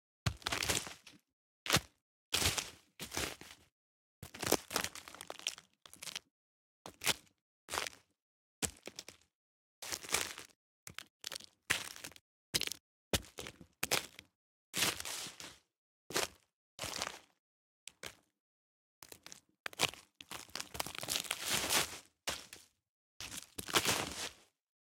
wood-impacts-breaking-stretching
field-recording, wood, breaking, impacts